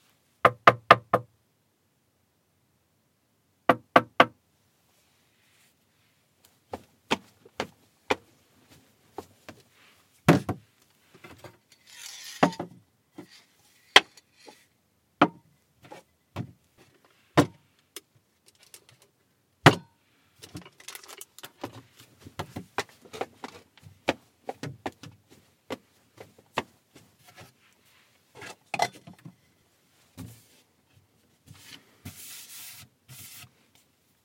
knocking wooden door and moving things over wooden surface